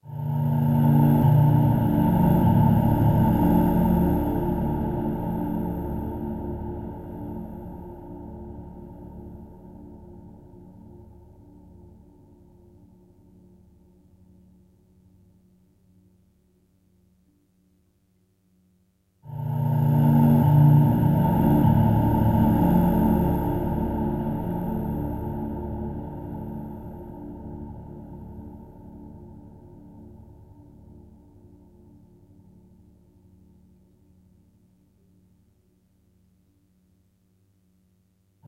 beautiful drone to give a suspense feeling